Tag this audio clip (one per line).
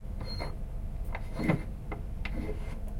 iron
shiny
bolt